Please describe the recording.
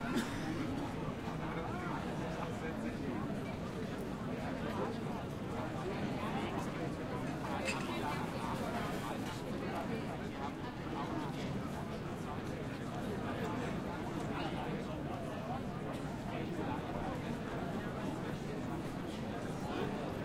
People in a cafe, pretty noisy. Loops.
Recorded on a Tascam DR-07 Mk II.
ambient, cafe, field-recording, noise, people